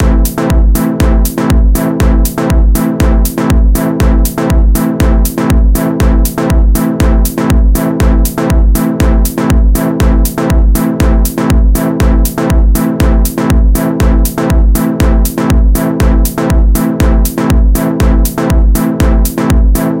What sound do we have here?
DrumBass 80sUpdown Am 120BPM
Actually, it's a little bit of a sound package. But sharing is always good. My drum bass sounds can be used in house, nu-disco and dance pop projects. Obviously when I was listening, I felt that these sound samples were a bit nostalgic. Especially like the audio samples from the bottom of pop music early in the 2000s. There are only drum bass sound samples. There are also pad and synth sound samples prepared with special electronic instruments. I started to load immediately because I was a hasty person. The audio samples are quite lacking right now. There are not many chord types. I will send an update to this sound package as soon as I can. Have fun beloved musicians :)
nu-disco, club, loop, soundesign, house, rhytyhm, programmed, chord